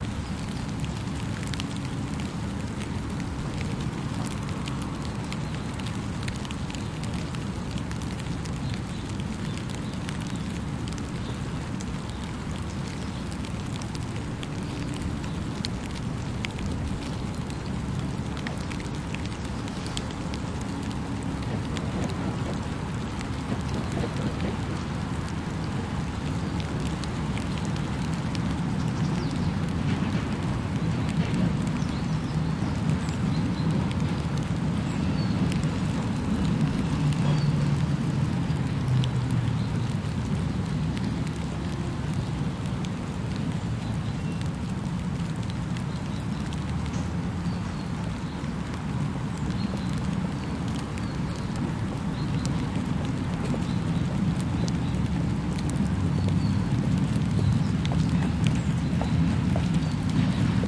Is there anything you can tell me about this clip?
Autumn rain. Recorded near ground. Sound of rain drops falling on fallen leaves. Birds. Background sound of tram at the end of record.
Recorded: 2012-10-28.
ambiance, rain-drop, leaves, rain, city, Autumn, town, Autumn-rain, drop, noise